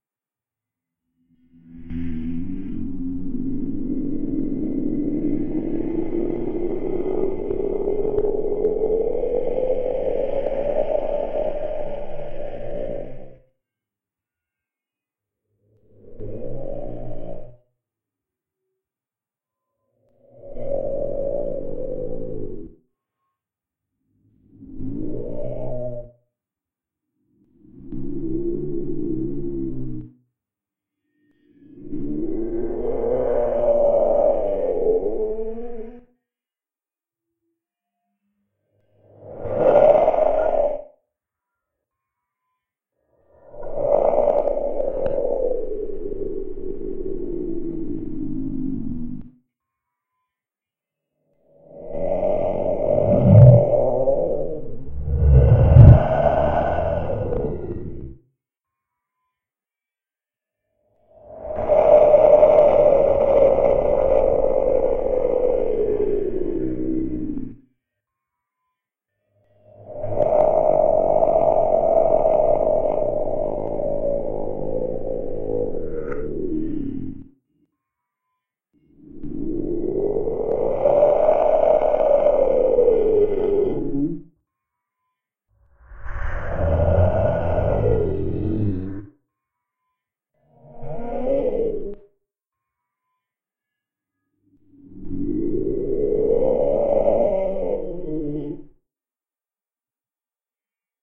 Demon Ghost Groaning 1
Sound of a ghost or demon groaning and moaning. This is a recording of me making a weird sound with my lips and then highly altering it by adding reverb, bass boost, and slowing it down among other things.
creepy, demon, devil, eerie, evil, ghost, ghostly, groan, growl, haunted, horror, howl, howling, moan, nightmare, paranormal, phantom, scary, sinister, snarl, specter, spectre, spooky